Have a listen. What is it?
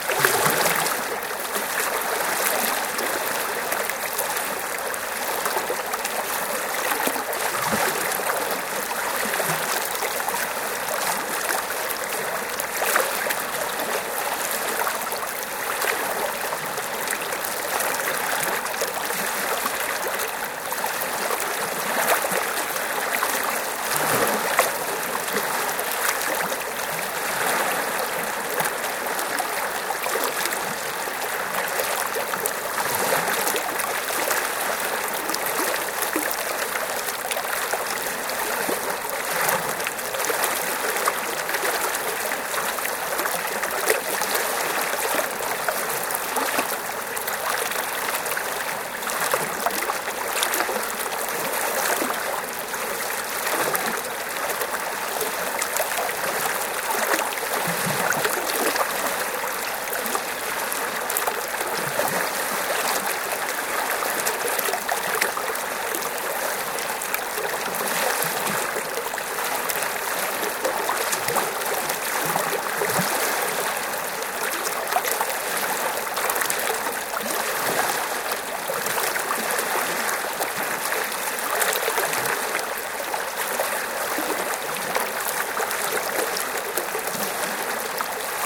Waves breaking on a small River in Basel.
water, waves